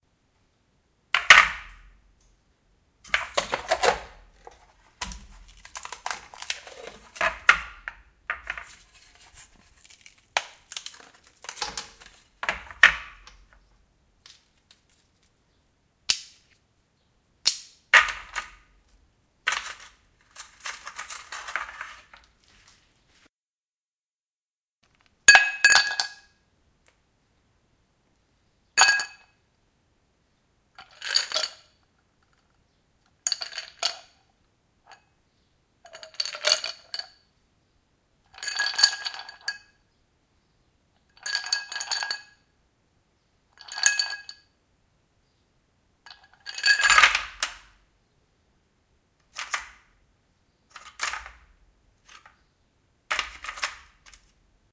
Various sounds with ice cubes.
ice-sounds, ice-cubes, ice